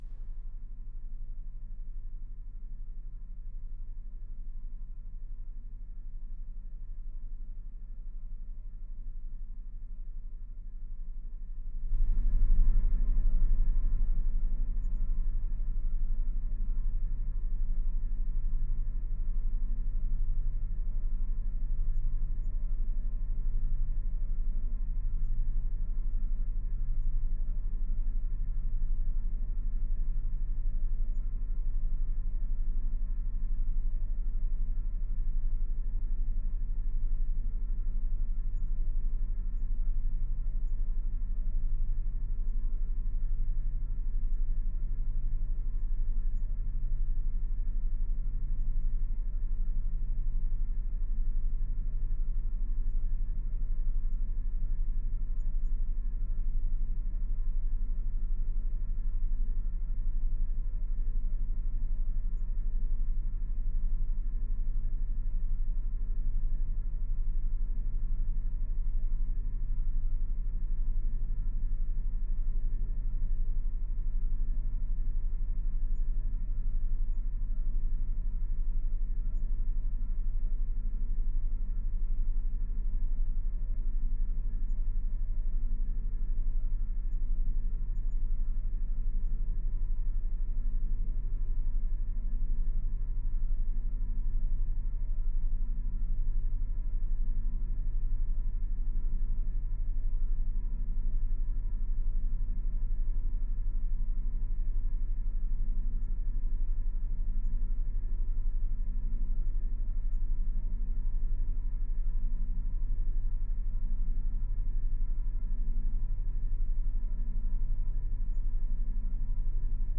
Free drone. Recorded using homemade contact microphones. The OS-XX Samples consist of different recordings of fans, fridges, espressomachines, etc. The sounds are pretty raw, I added reverb, and cut some sub. I can, on request hand out the raw recordings. Enjoy.
Drone, Atmosphere, Eerie, contact-mic, Ambient, Sound-design